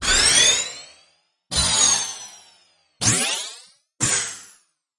Synth Power Change
Synthetic speedy on/off sounds for interfaces and/or game powerups. Made with the Granular Scatter Processor and Sliding Time Scale / Pitch Shift.
Edited with Audacity.
Plaintext:
HTML: